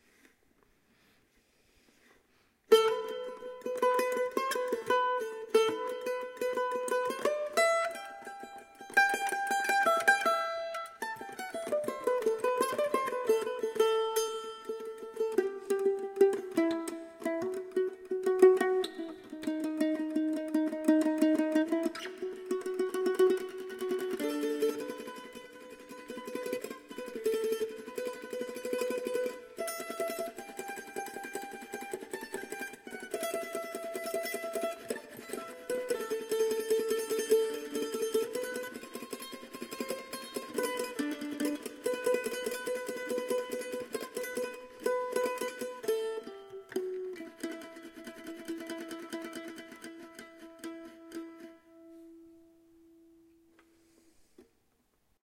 Mandolin for a sad piece I used for a book on tape. I played the instrument into a high quality mono mic with a preamp, then added some reverb.